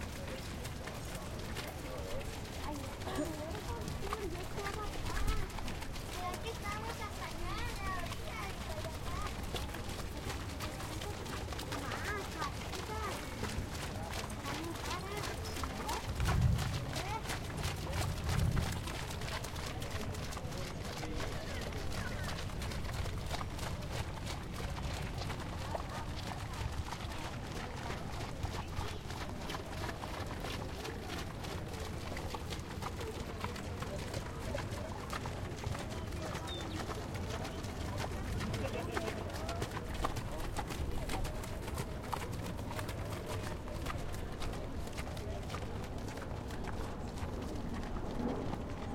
horses walk to the gate on a sloppy track
This is the sound of horses walking by at Arapahoe Park in Colorado. The track is now listed as sloppy and you can hear how muddy it is. Some crowd sounds.
horse-race, crowd, horse, racing